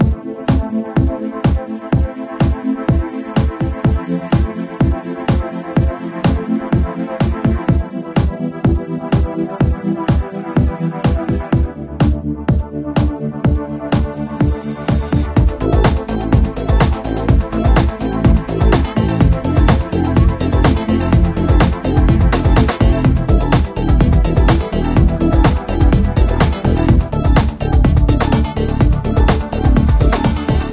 A music loop to be used in storydriven and reflective games with puzzle and philosophical elements.